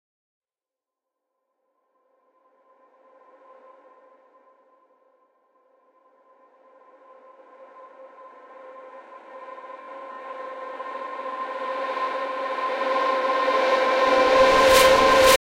This was a impact sample. I reversed the sample to make a interesting riser. To add more motion Corpus was incorporated to add a hint of strings to the sound. All the processing was done using Ableton Live.